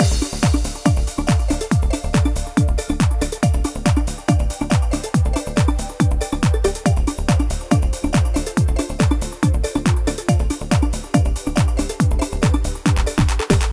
A loop with decent dynamics to add stuff on